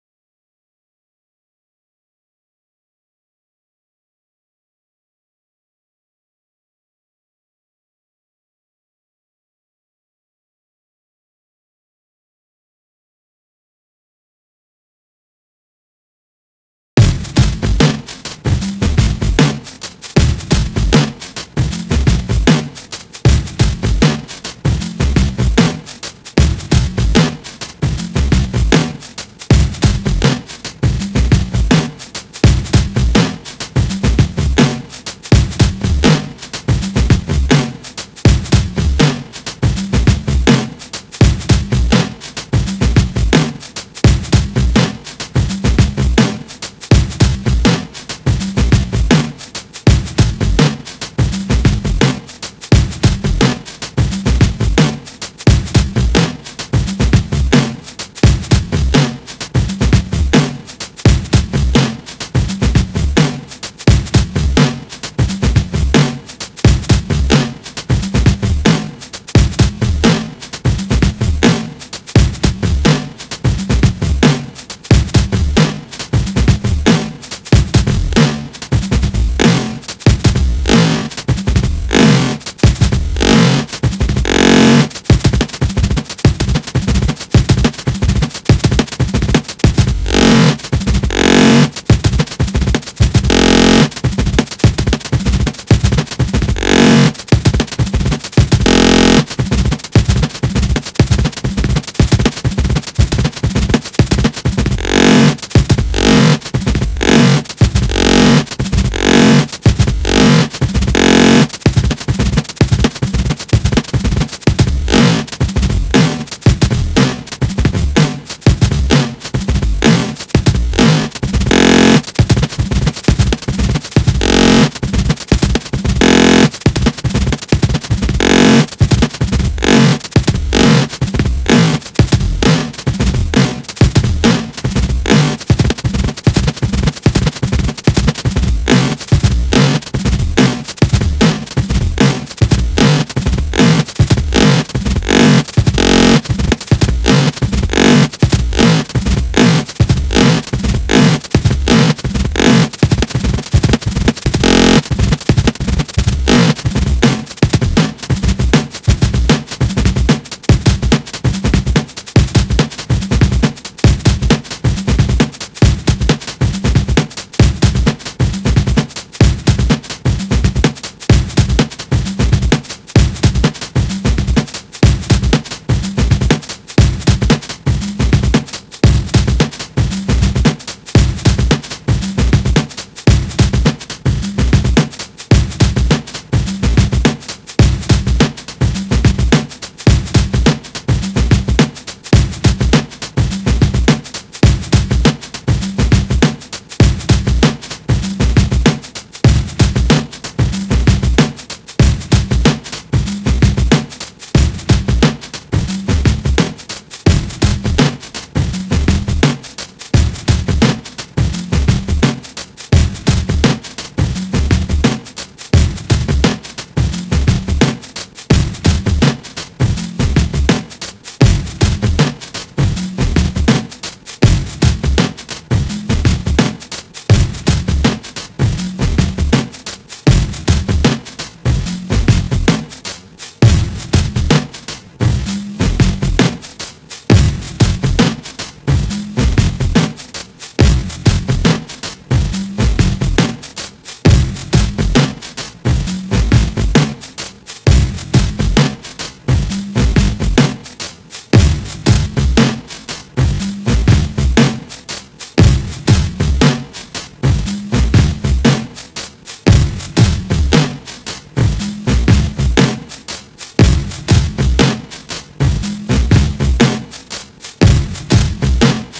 strange-effect-one
While working on a timestretching algorithm something went wrong in the calculations. This little piece of fine glitchyness happened. Wait for somewhere in the middle when it starts really going wrong.
algorithmic, distorted, effect, fail, failed, loop, timestretch, weird